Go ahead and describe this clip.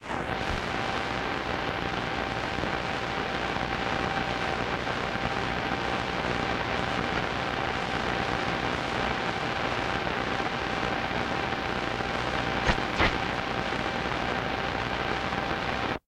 Radio Noise 12
Some various interference and things I received with a shortwave radio.